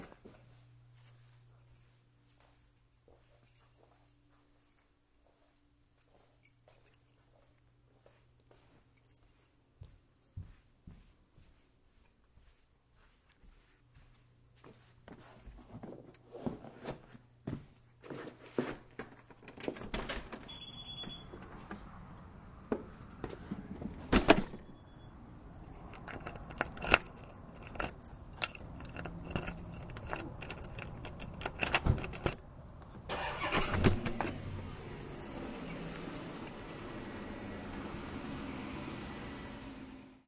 A recording of me departing my house by day.
beep, beeping, depart, exit, jingling, keys, lock, locking, rattling